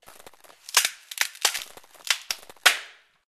JBF Squirrel Climb